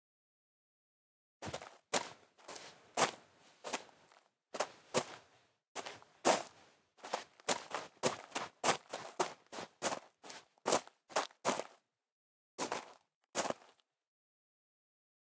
FX Footsteps Gravel01
walk, walking, step, footstep, steps, footsteps, foot, foley, shoe, feet